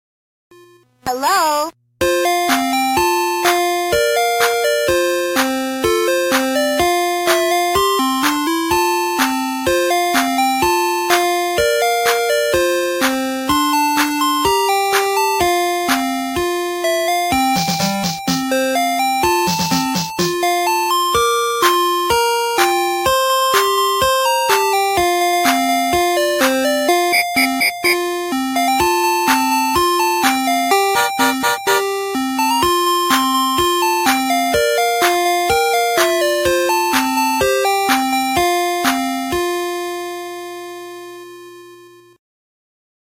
Hello Picnic Ice Cream Truck Song
This is probably the most used ice cream truck chime song you will hear. Enjoy
Cream, Ice